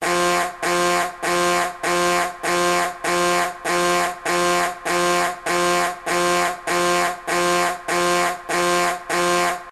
Loopable sound that I created as a ringtone designed for callers you don't want to mistake with others. lol